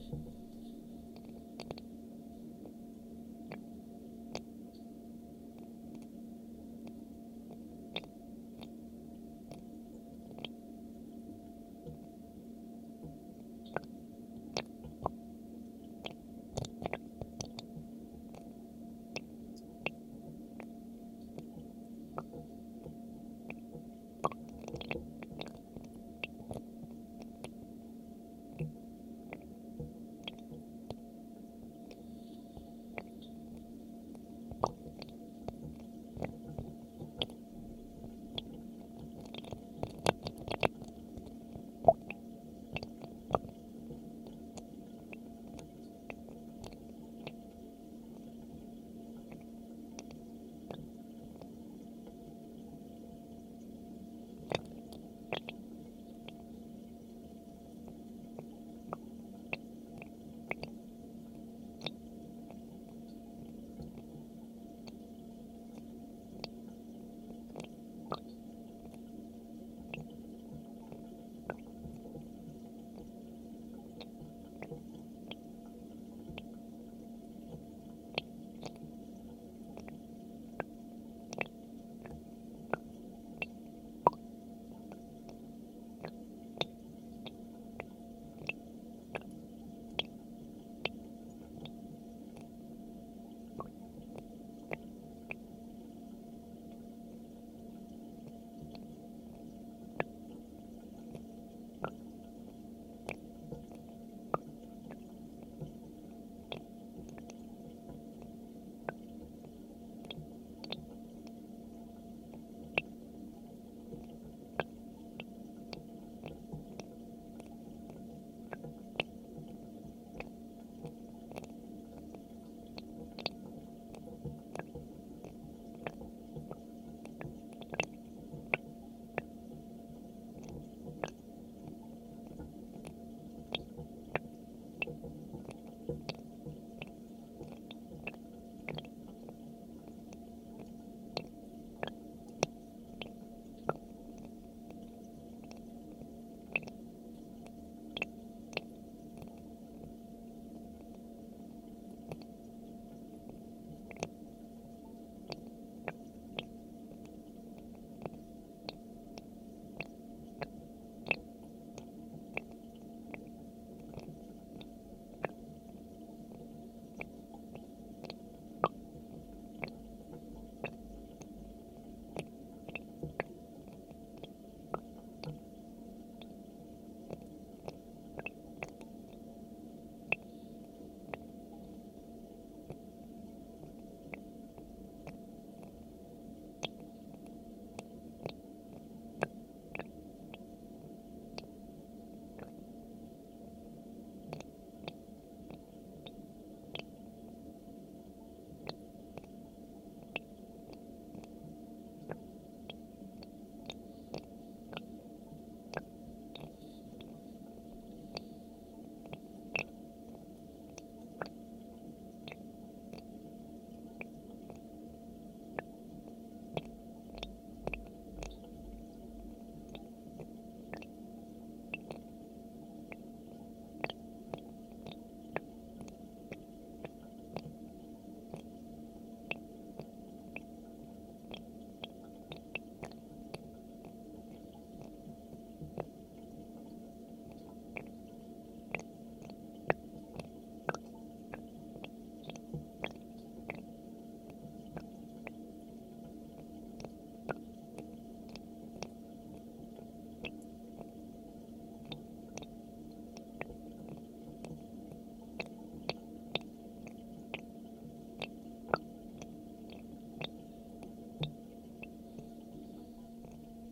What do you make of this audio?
ATM CONTACT bathroom sink low flow
Water goes through sink pipe. Recorded on Barcus Berry 4000 mic and Tascam DR-100 mkII recorder.
atmos, atmosphere, bathroom, flow, low, pipe, sink, through, water